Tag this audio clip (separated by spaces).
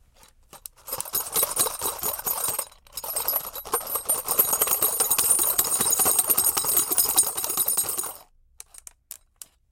box Caixa-ferramenta Machine Tool